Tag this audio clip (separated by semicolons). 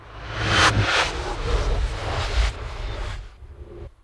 diizzy; turn; UPF-CS14; campus-upf